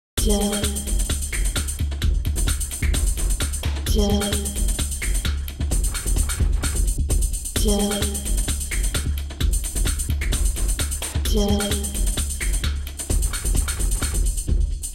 ELECTRO LOOP
electro synth loop
electro, house, loop, synth, techno